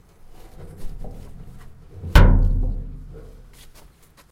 Metal Clong
creepy, dark, drama, horror, Impact, sinister